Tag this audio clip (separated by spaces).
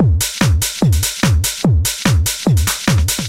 146bpm beat break breakbeat drum hard loop techno trace